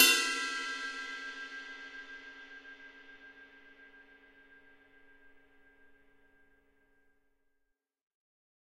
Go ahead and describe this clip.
bell ride 15
rides - bells, ride, bell, dw, ludwig, yamaha, tama, crash, cymbals, drum kit,
drums, percussion, sabian, cymbal, sample, paiste, zildjian, pearl
pearl, cymbals, cymbal, sample, zildjian, dw, bells, yamaha, sabian, kit, ludwig, rides, crash, paiste, drums, ride, tama, bell, percussion, drum